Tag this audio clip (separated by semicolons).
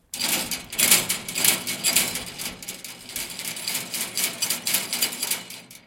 Impact; Tools; Bang; Metal; Smash; Plastic; Boom; Tool; Hit